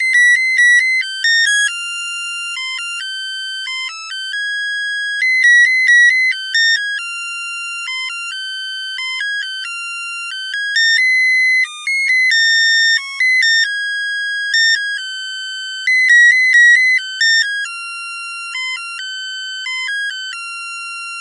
Beethoven Lamp
It's a musical lamp I registered at a friend's house two years ago. It seems to be a headlamp, but I'm not quite sure anymore.
As you guessed, it plays Beethoven's song called "Für Elise".
Use my files wherever you want and however you want, commercial or not. However, if you want to mention me in your creations, don't hesitate. I will be very happy ! I would also be delighted to hear what you did with my recordings. Thanks !